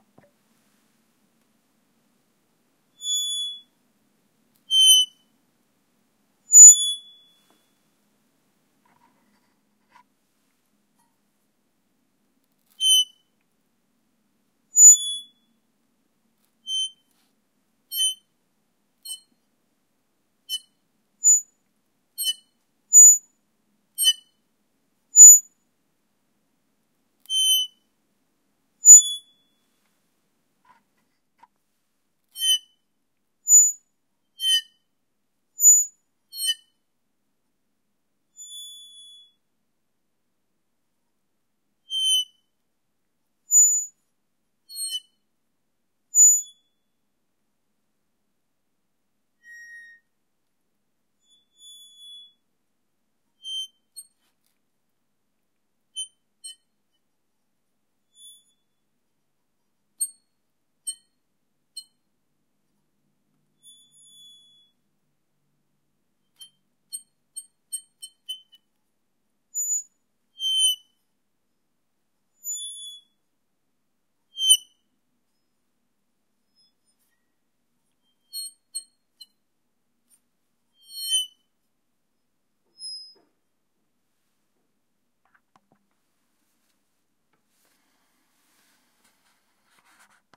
This is a "squeak" sound from my teapot's handle ! Recorded with Sony PCM-D50
teapot, metal, squeak